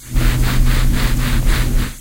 Golden wall texture exploration, small and isolated room.